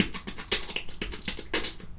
Loop2 006 Scratchy
I recorded myself beatboxing with my Zoom H1 in my bathroom (for extra bass)
This is a beatbox emulation of a rhythmic scratch. Loops at 120bpm but not perfectly.